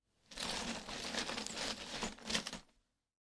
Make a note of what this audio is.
crawling-broken-glass016
Bunch of sounds I made on trying to imitate de sound effects on a (painful) scene of a videogame.